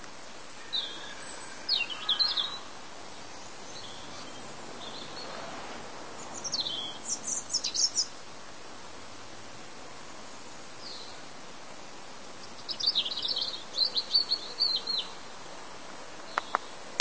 A robin (European) singing.